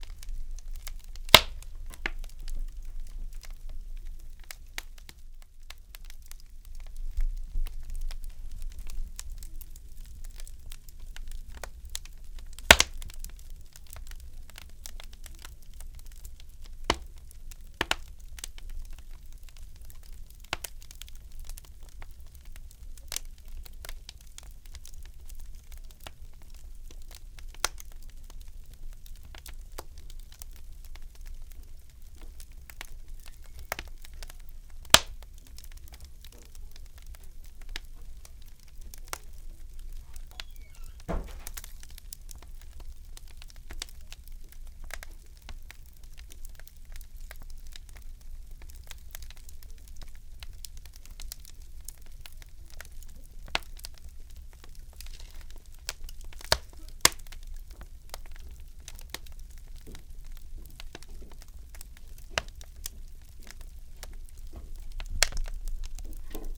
village furnace crackle firewood
In a clay stove in the village